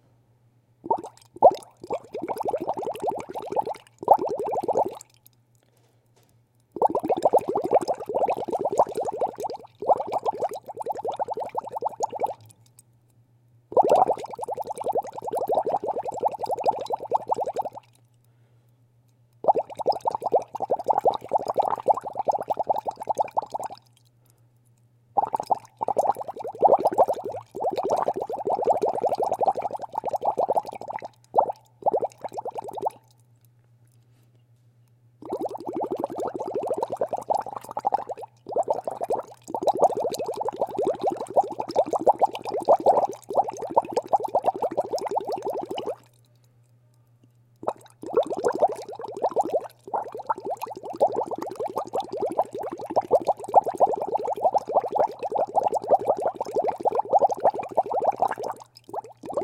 water blups
babbling, gurgle, liquid